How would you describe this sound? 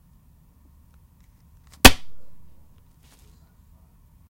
Dropping a leather wallet on a table.